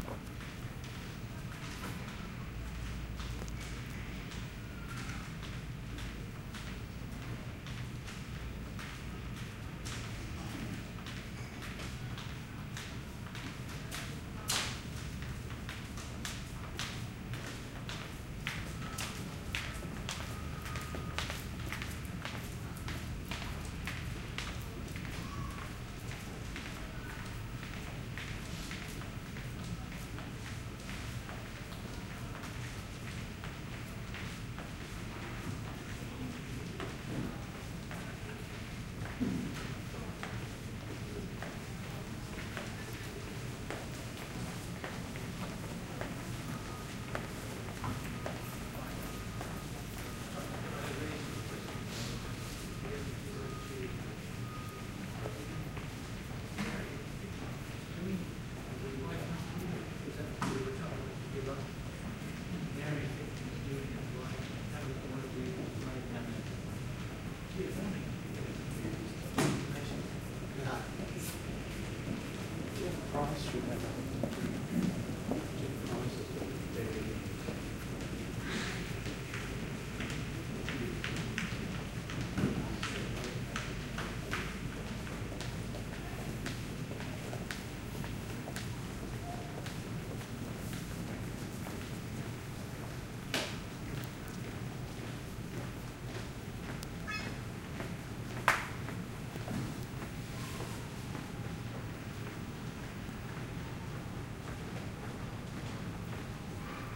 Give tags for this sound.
people; crowd; steps; airport; walking; foot

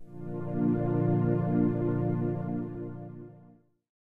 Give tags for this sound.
startup; computer; melodic; futurism; technology; synth; electronica; boot; futuristic; bootup; soft; start; login

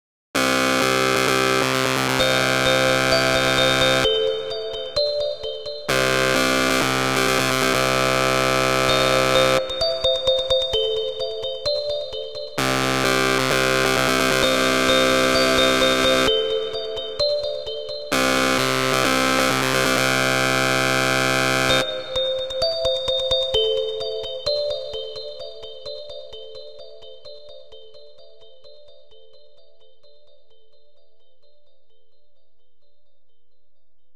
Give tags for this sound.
electric; sound; Dog; pizza; EL; horse; King

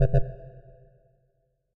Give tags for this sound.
alien; am; low-pass; weird

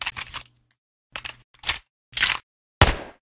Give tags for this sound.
ammo; reloading

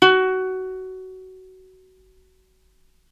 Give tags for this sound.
sample; ukulele

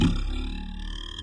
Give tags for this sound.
tech; pcb; musical

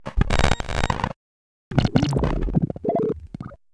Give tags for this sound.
biopod; film; pod; space; info; soundesign; scoring; organic; oldschool; soundtrack; computer; future; lab; digital; effect; plug; bleep; spaceship; signal; sci-fi; cartoon; fx; commnication; soundeffect; retro; movie; analog; computing; funny; data

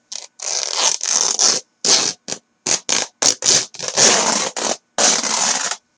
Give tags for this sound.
breaking; cloth; clothing; fabric; material; noise; pants; rip; ripped; ripping; tear; tearing; torn

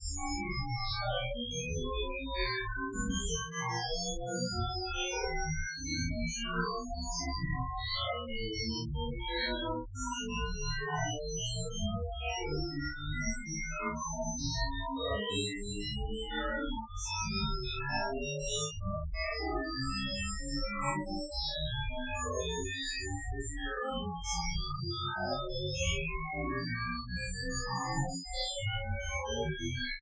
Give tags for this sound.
image; noise; space; synth

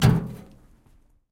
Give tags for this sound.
hard; hit; kick; metal; percussive; wheelbarrow

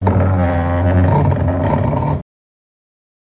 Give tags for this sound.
chair; floor; sound

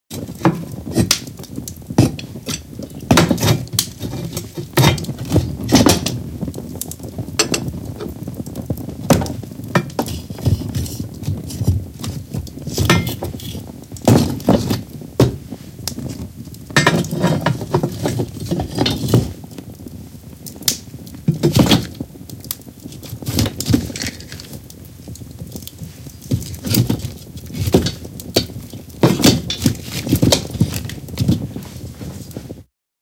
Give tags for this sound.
Feild-recording; Fire; Wind; Earth; Water

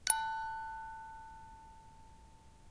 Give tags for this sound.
bell; box; music; tones

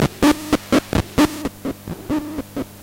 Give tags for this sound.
electronic-percussion; electroni-drum; loopable; Mute-Synth-2; Mute-Synth-II; seamless-loop; synth-drum

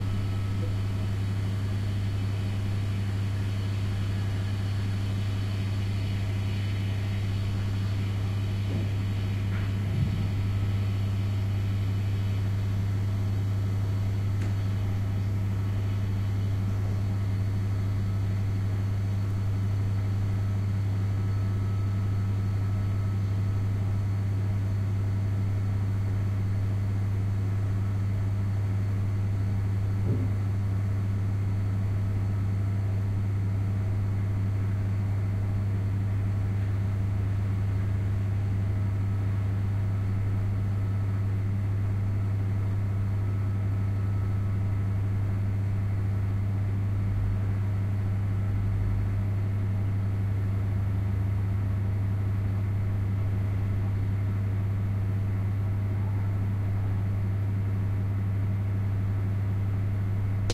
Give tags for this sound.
air-conditioner; bathroom; drone; fan; field-recording; hum; noise; Room; tone

